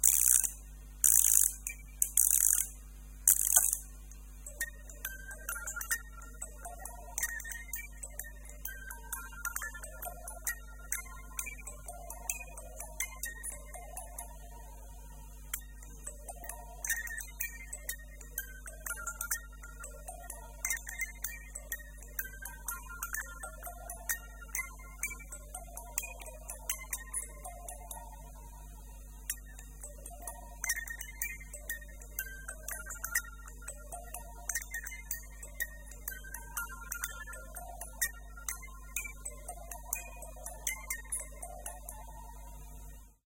My "le Petit Prince" music box
humming, little-prince, melody, music-box, musicbox